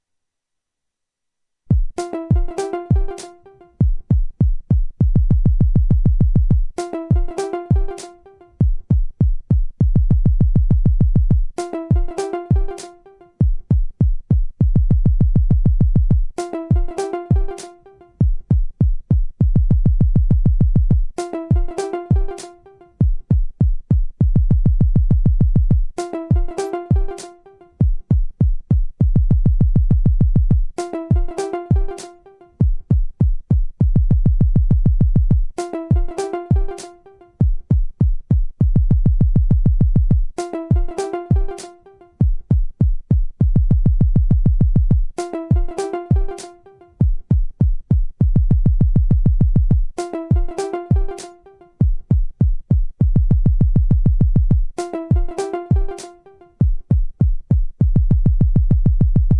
spacy, rhythm with that little something extra. You be the judge.